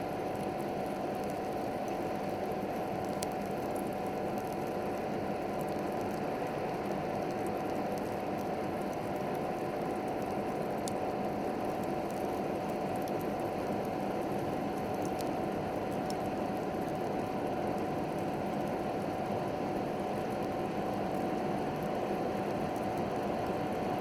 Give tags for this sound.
blower; coal; forge; work; 8bar; fan; labor; blacksmith; fireplace; crafts; 80bpm; metalwork; furnace; field-recording; tools

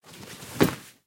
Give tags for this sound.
voltear; giro; voltereta

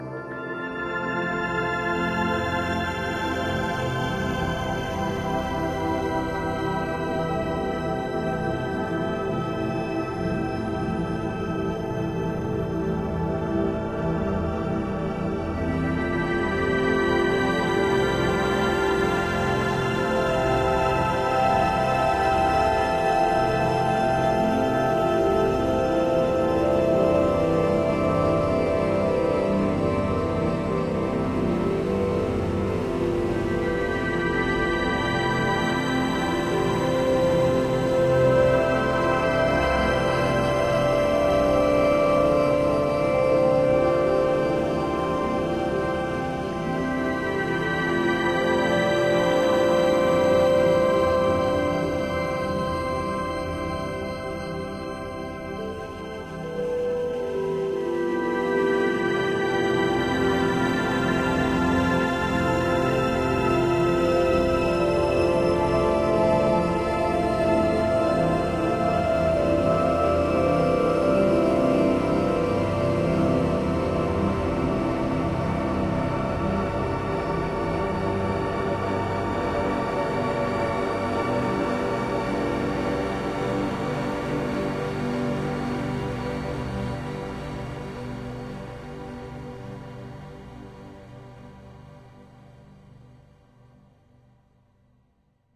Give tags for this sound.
Dissonance; FM; Synth

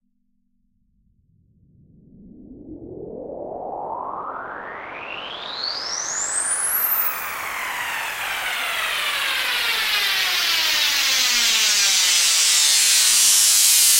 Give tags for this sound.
edm; riser; trance

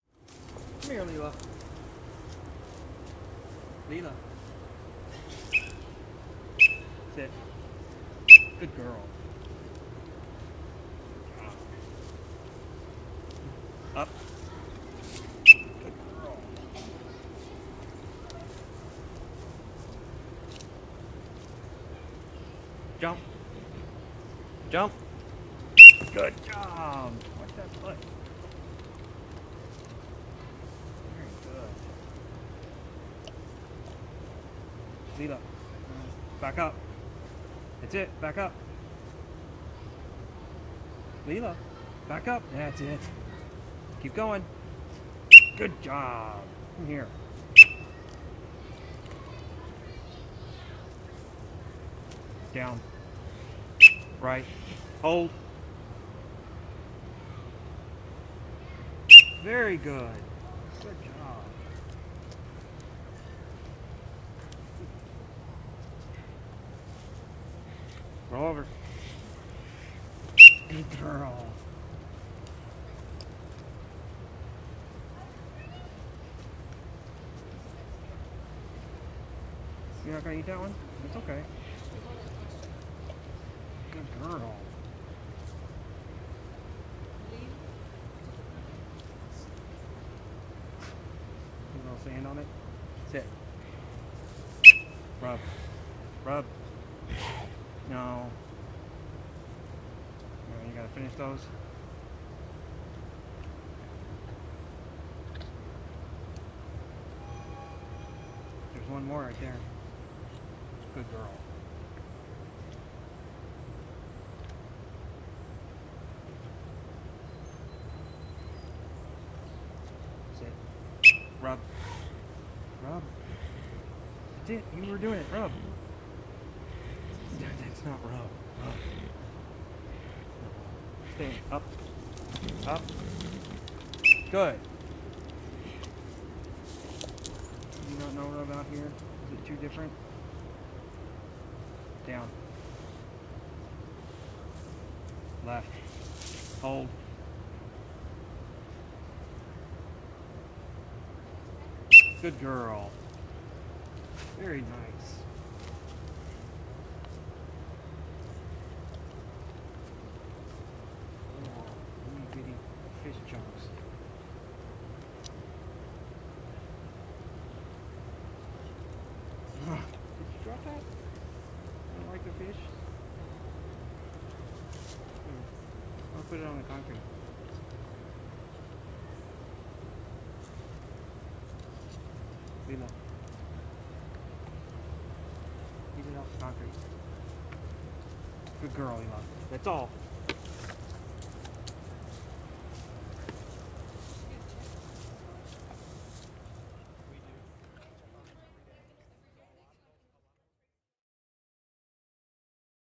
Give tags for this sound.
animal; animals; cats; commands; field-recording; tiger; trainer; training; training-whistle; whistle; zoo

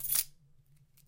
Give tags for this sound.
clang; grab; hand; house; keys; rattle; se2200; shake

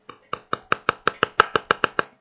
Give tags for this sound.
chattering; clack; click; crush; effect; fast; foley; human; nature; noise; raw; shivering; slice; teeth; tooth; vegetable

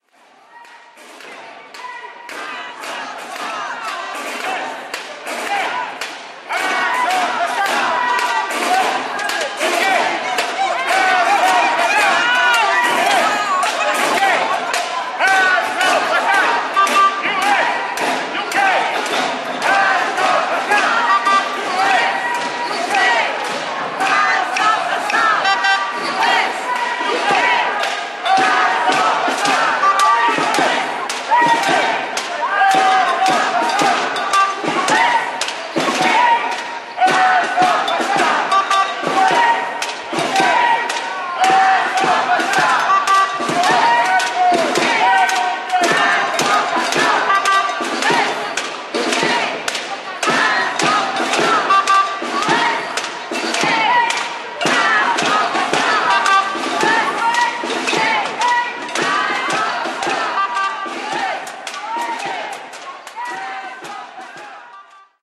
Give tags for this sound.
assange; chant; crowd; extradition; free; freeassange; julianassange; london; oldbailey; protest

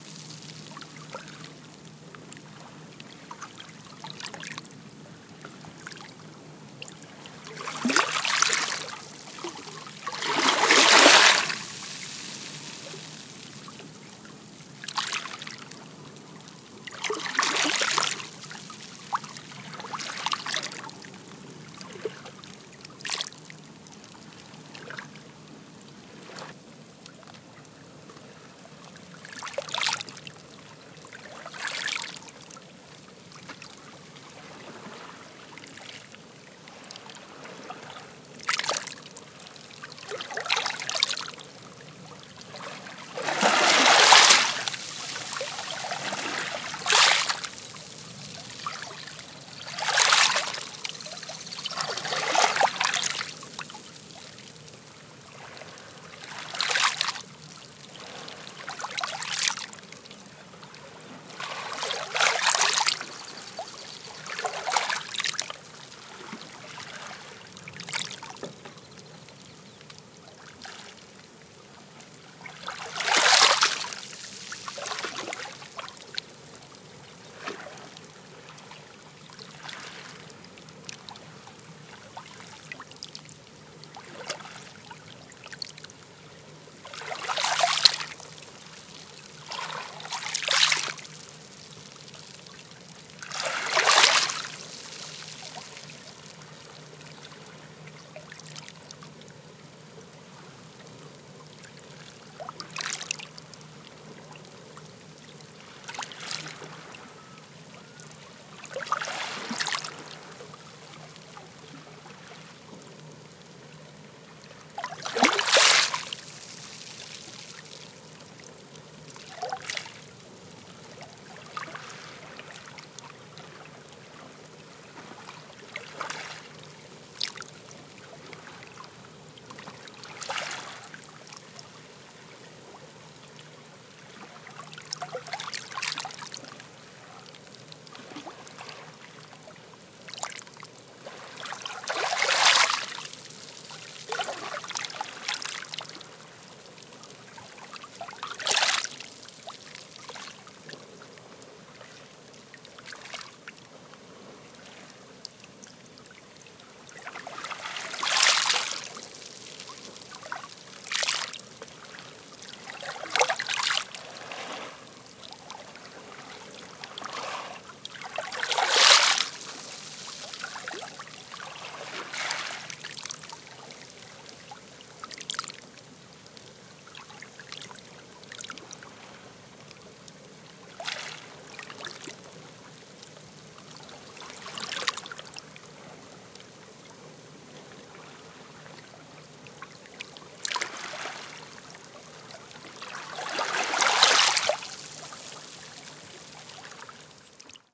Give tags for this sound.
quiet; waves; ambient; seaside

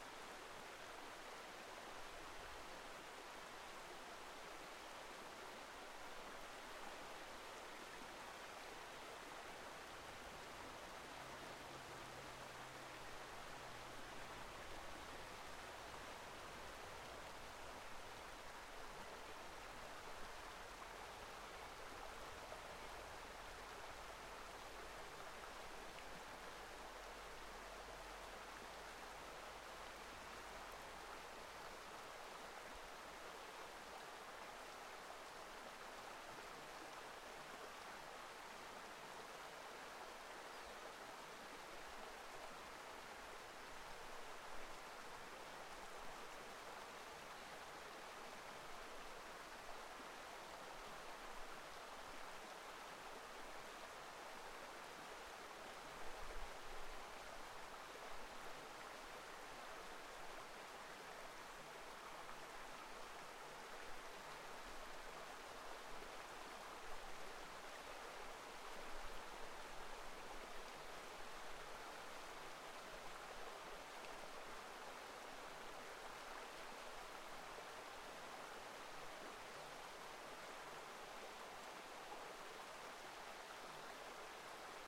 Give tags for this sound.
ambience; close; river

